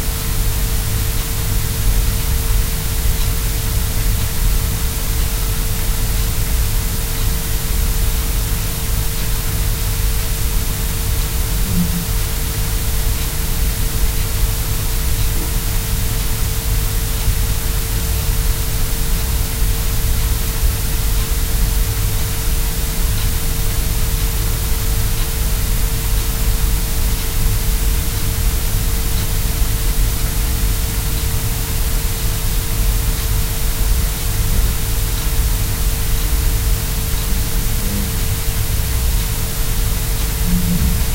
High Pink Noise
crackling, static, pink, noisy, feedback, white